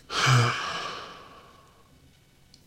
Sigh 3 Male Deep
A young male sighing, possibly in frustration, exasperation, boredom, anger, etc.